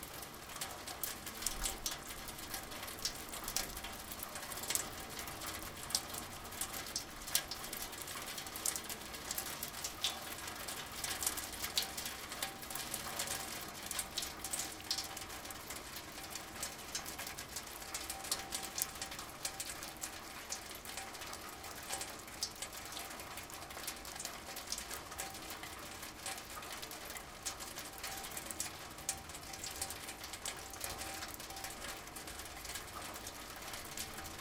Rain falling around and through the downspout of a rain gutter in the courtyard of the apartment building where I stayed in St. Petersburg. There were 3 or 4 downspouts from which I made a total of 7 recordings. September 3, 2012, around 4 PM. Recorded with a Zoom H2.